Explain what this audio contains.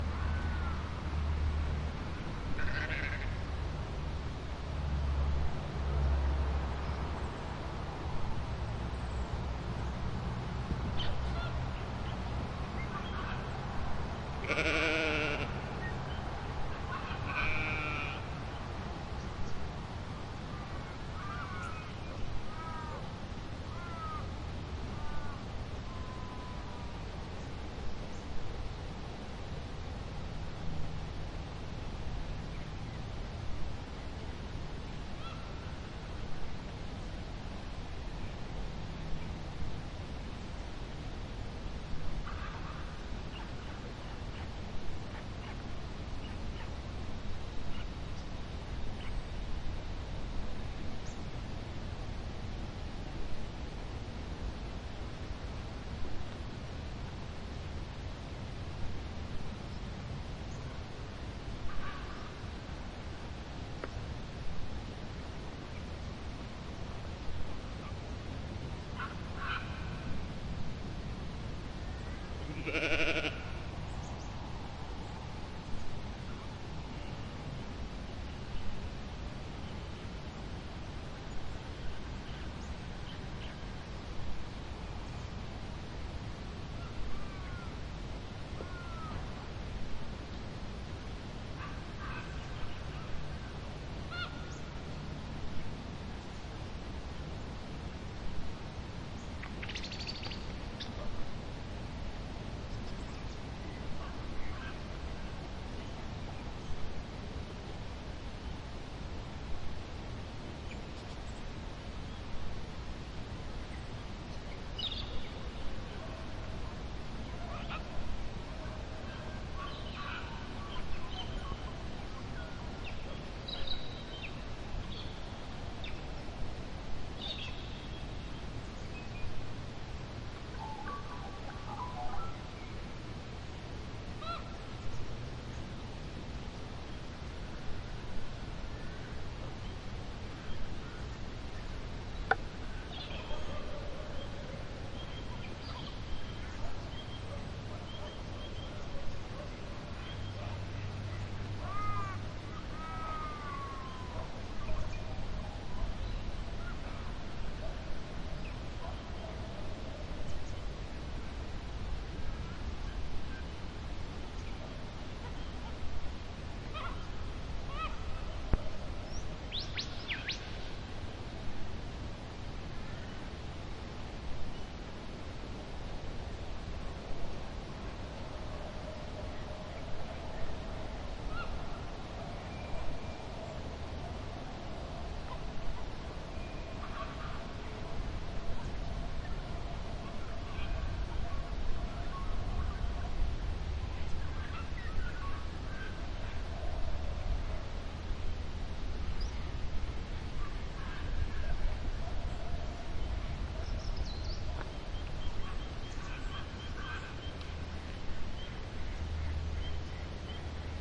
Recorded near Jim Coles sheep paddock. Some birds can be heard in the background.